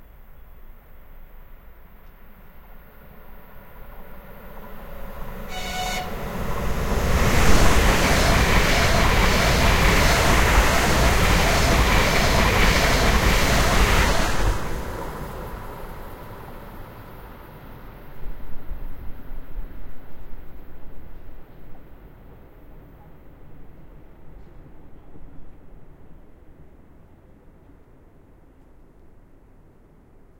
Sapsan high-speed train Moscow to Saint-Petersburg passing small train station, Moscow area OMNI mics
High-speed train named "Sapsan" that rides a route between Moscow & Saint-Petersburg passes small train station.
rail-road, high-speed, train, Moscow, express-train, doppler, Saint-Petersburg, by, Russia, Sapsan, rail, passenger-train, passing, pass